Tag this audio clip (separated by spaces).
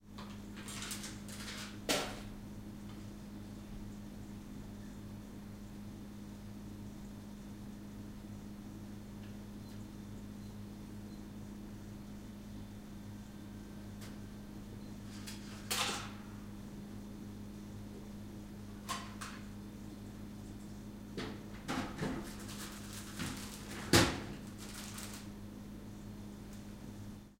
buzz vending hum machine